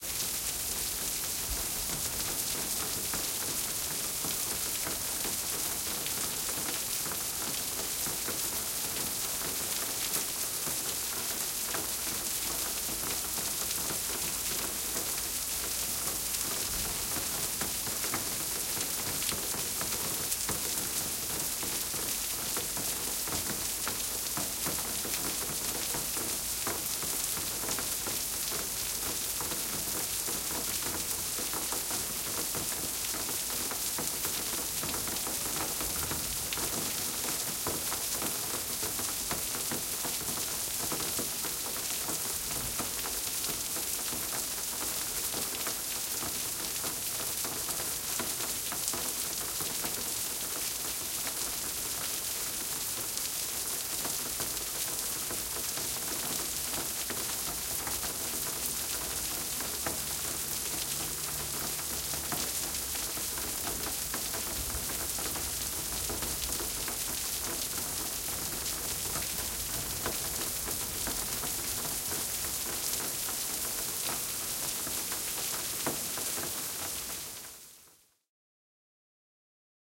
Rainy night in New Orleans
Heavy rain in New Orleans. Recorded 27 April, 2016.
New-Orleans, rain